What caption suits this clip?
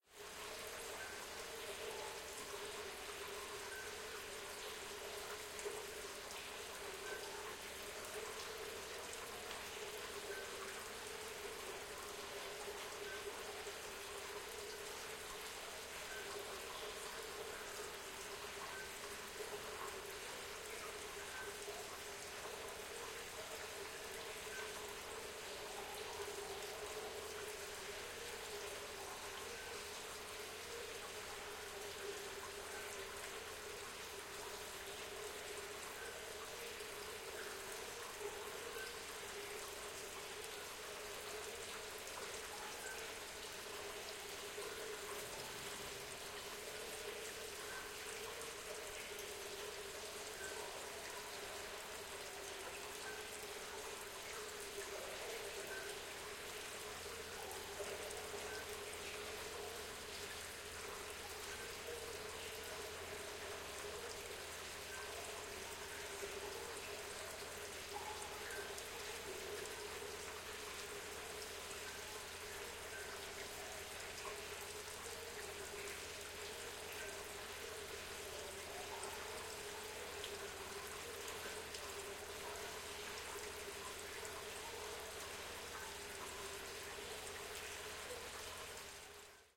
A fountain, called "fontaine Diane" in a reverberant space located in a small quiet village, Lectoure, France, Gers. Recorded with MS schoeps microphone through SQN4S mixer on a Fostex PD4. decoded in protools
fountain, fontaine-diane, night, gers, reverb, ambiance